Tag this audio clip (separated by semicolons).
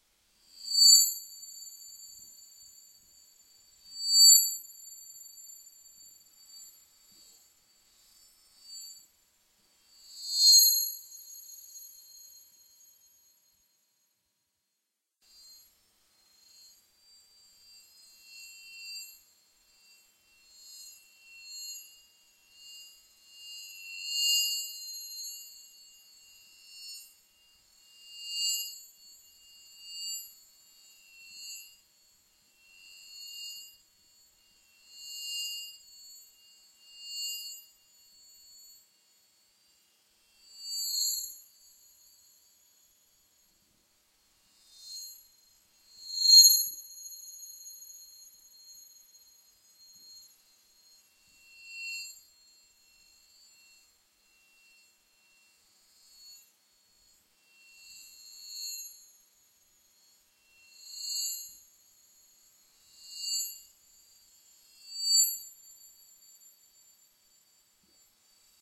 ambiance; cinema; cinematic; controlled-feedback; electric; feedback; high-pitched; lord-of-the-rings; metal; metal-object; metal-ring; mic-system; noise; object-of-power; outer-space; power; ring-of-power; sci-fi; space; static; the-nine-rings; the-one-ring; the-ring